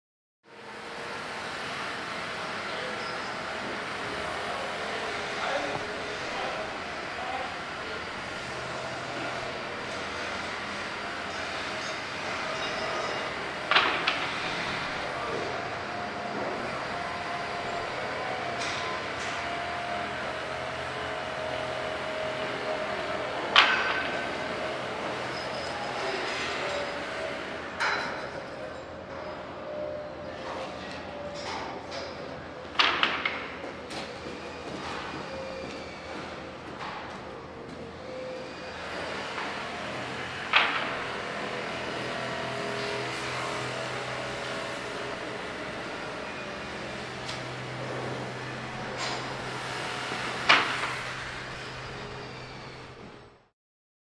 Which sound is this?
Recorded on Marantz PMD661 with Rode NTG-2.
Exterior ambience on a building site with drills, hammering, rattling chains, clanging scaffolding and voices of builders.